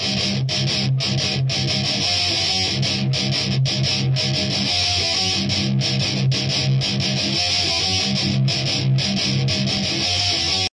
THESE ARE STEREO LOOPS THEY COME IN TWO AND THREE PARTS A B C SO LISTEN TO THEM TOGETHER AND YOU MAKE THE CHOICE WEATHER YOU WANT TO USE THEM OR NOT PEACE OUT THE REV.